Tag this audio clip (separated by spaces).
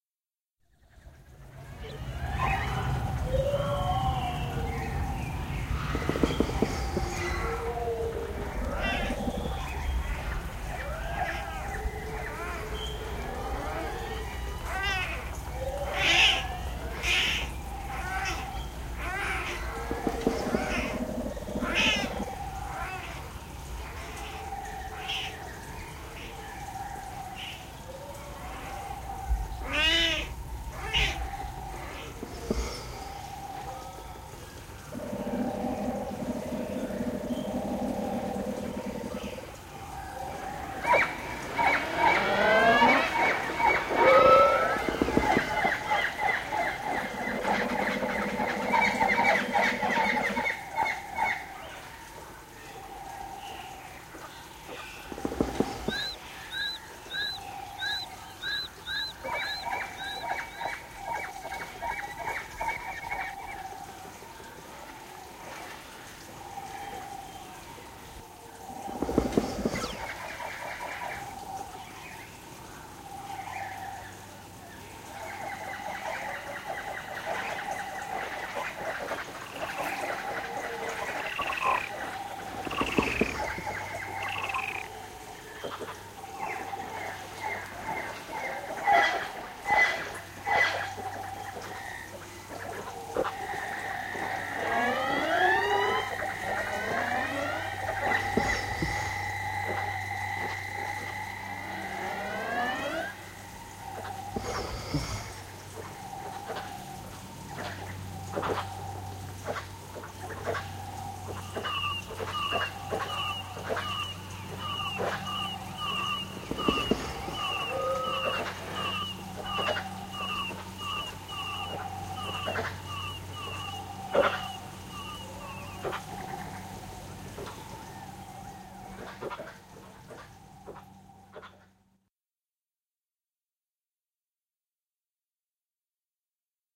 scary ambience